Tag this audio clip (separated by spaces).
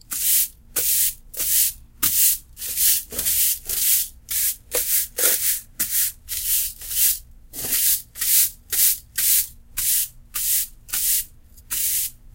sweeping falling cleaning broom sweep